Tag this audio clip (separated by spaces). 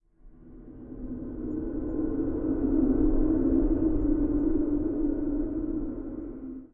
Spirit Supernatural Serious Paranormal Ghost